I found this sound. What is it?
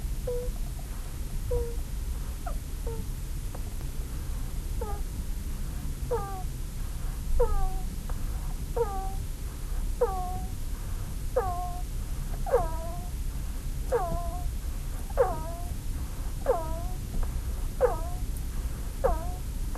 these are the sounds my hamster was producing. i think she was snoring